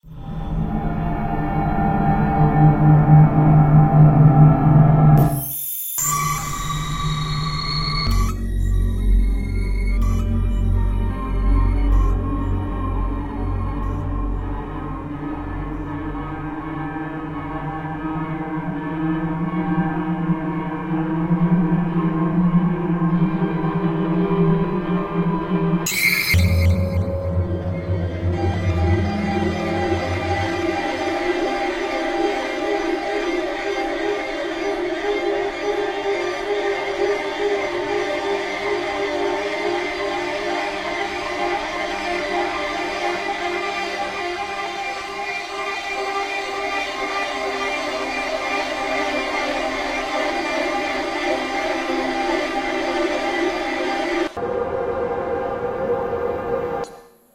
Sound squeezed, stretched and granulated into abstract shapes
abstract, ambient, drone, granular, noise